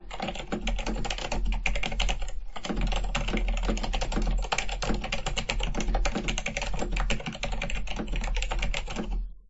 Typing sounds from a modified Omnikey 101 keyboard. Keycaps are not stock, replaced with the PBT caps from a Dell AT101 and the switches have been replaces with Alps SKCMBB switches (dampened creams) that have been lubricated and modified to have 2 tactile leaf-springs per switch.